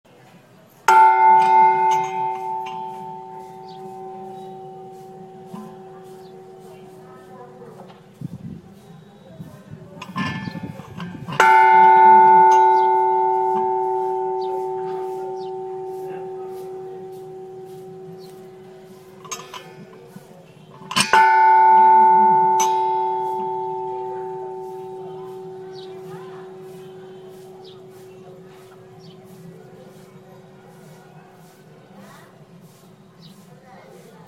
its the ringing of bell.. a temple bell
at pashupatinaath oldage home. kathmandu nepal